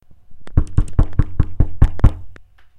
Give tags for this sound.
knuckle-rap door knock knocking pounding

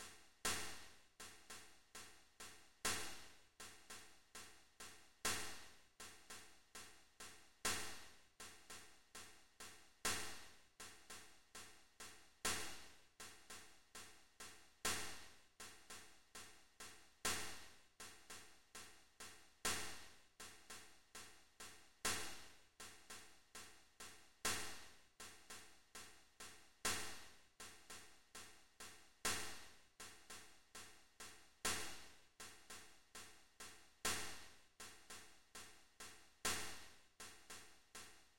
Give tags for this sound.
100bpm dry loop metal percussion reason

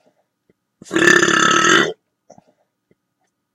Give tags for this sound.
burp burping loud

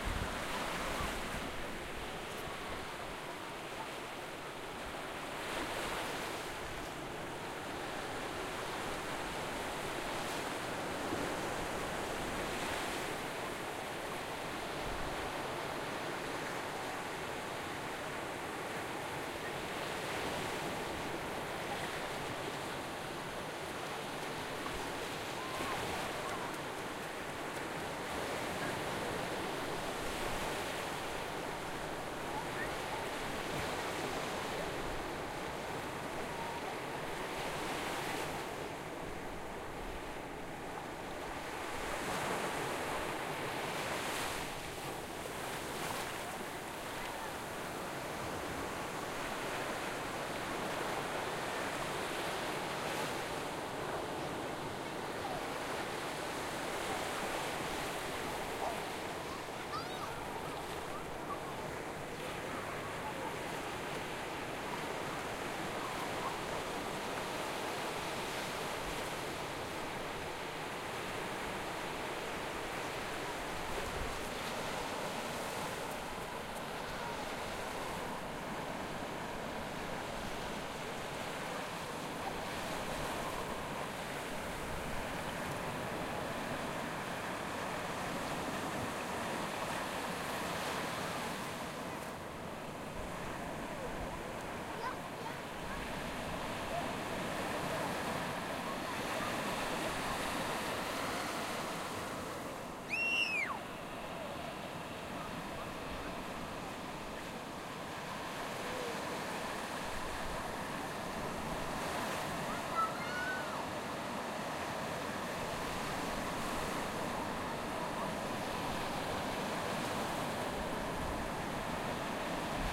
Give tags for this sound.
ambience atmosphere beach children-playing Cornwall England field-Recording sea soundscape waves

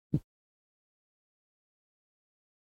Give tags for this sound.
stick tap hit mid object thap dry snap

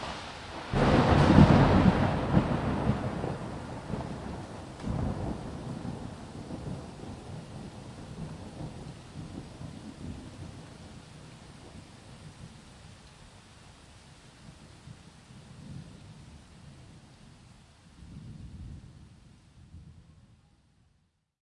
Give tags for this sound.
Rain Weather Storm NYC Lightning Thunder

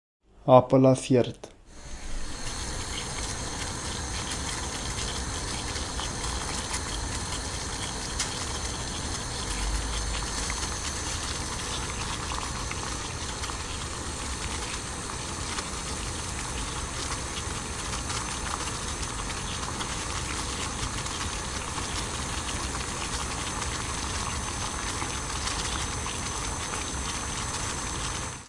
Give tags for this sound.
boiling-water-on-stove kettle stove

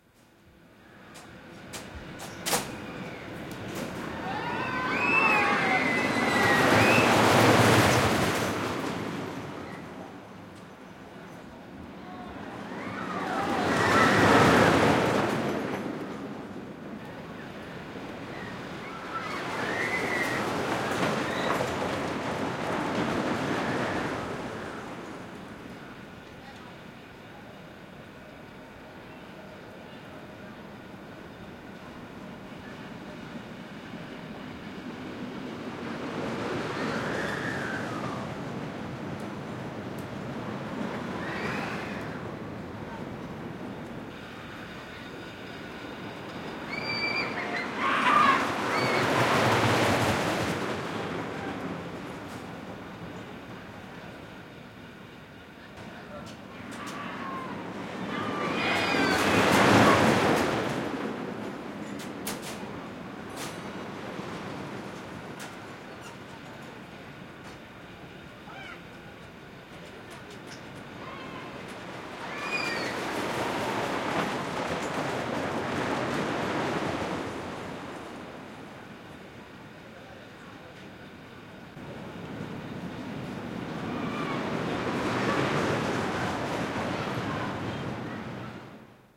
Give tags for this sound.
amusement-park field-recording finnish-broadcasting-company helsinki huvipuisto vuoristorata yle yleisradio

